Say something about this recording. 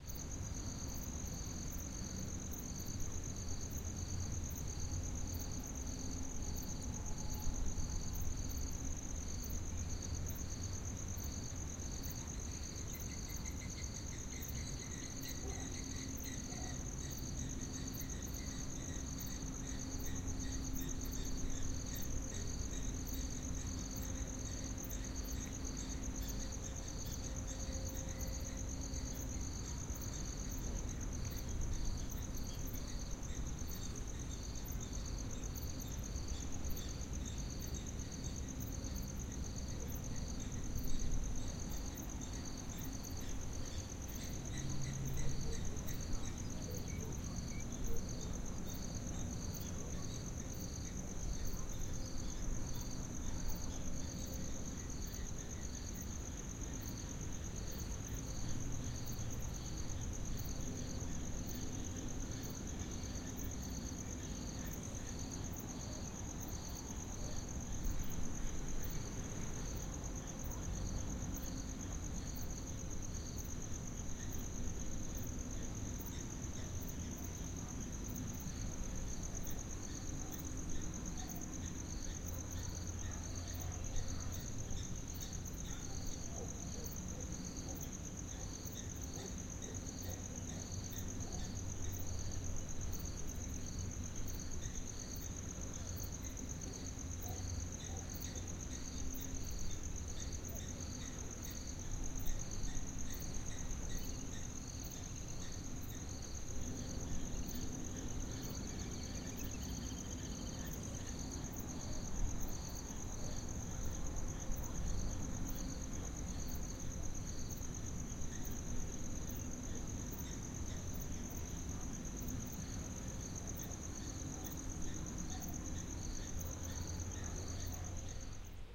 Night ambience taken in a field, includes birds, crickets, and dogs.
Recorded with the Zoom H6 Recorder.